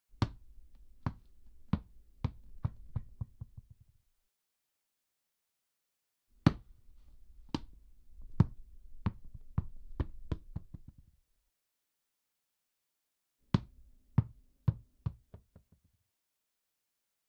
14 Tennis ball - Drop
Dropping a tennis ball on the ground.
ball, CZ, Czech, Drop, Ground, Pansk, Panska, Sport, Tennis